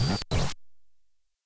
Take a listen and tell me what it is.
a speaking educational toy run through a homemade bit-crushing and pith-shifting effects box. VERY lo-fi (because I like that sort of thing)